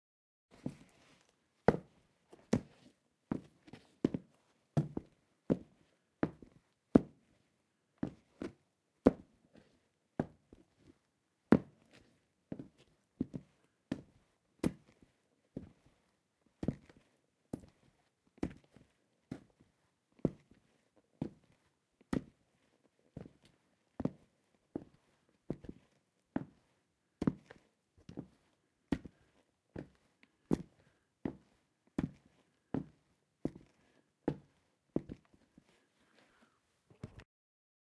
Walking on hard floor with boots
ambient, boots, floor, foot, footsteps, hard, shoes, soundscape, steps, walk, walking